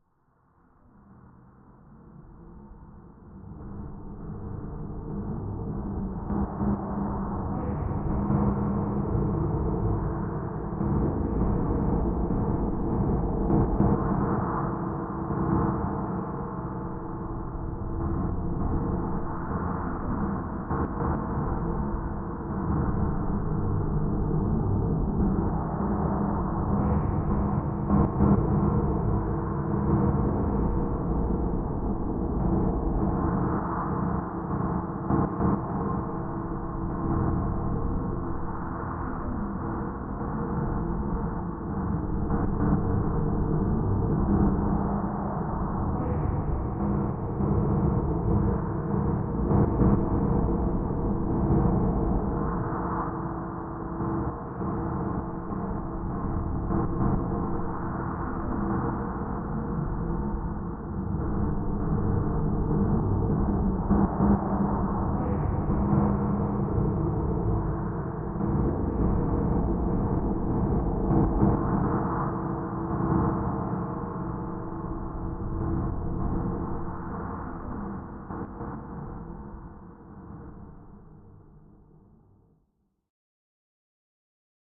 Fx_Soundscapes from manipulating samples(recording with my Zoom H2)